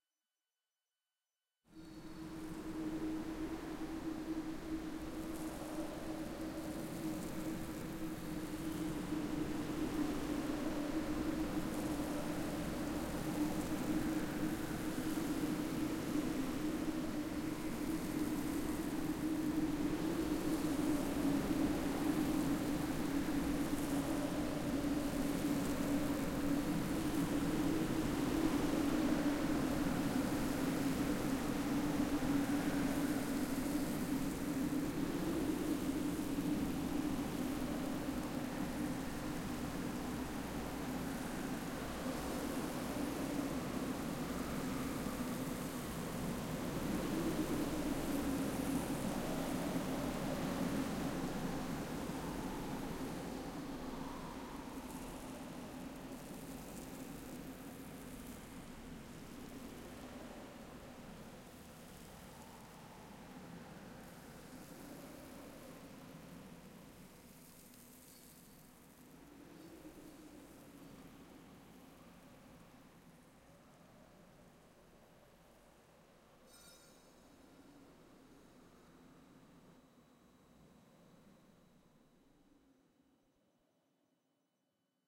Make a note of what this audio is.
Desert Monolith
A droney off world desert atmosphere with wind and sand.
desert, world, alien, sandy, drone, atmosphere, grainy